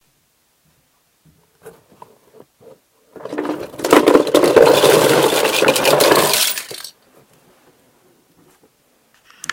A large collection of toy cars being slowly dumped onto the floor. This sound could be used as is or edited to appear as if on a larger scale.